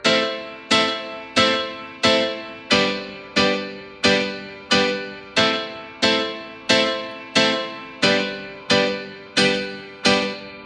zulu 90 A Piano chop
Reggae rasta Roots
Reggae, Roots